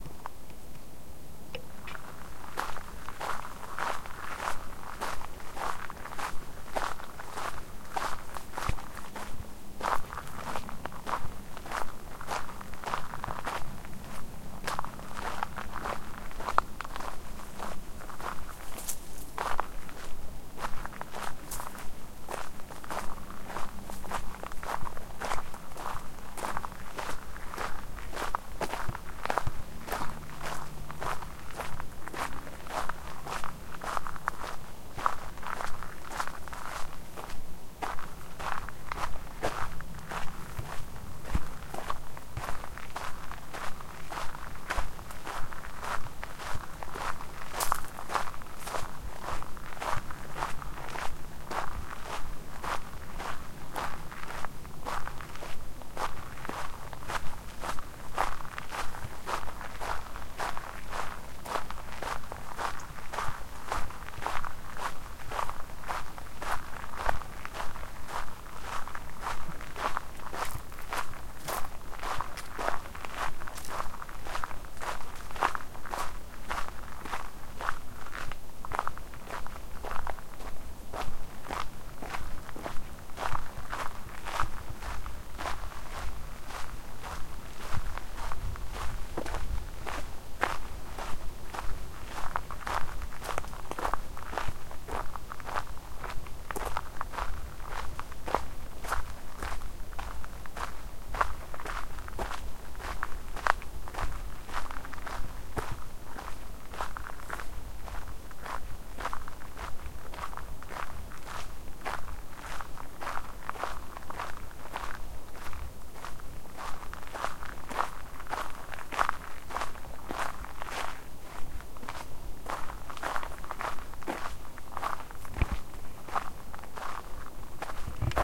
The sound of footsteps on summer dry coarse sand on a forest path.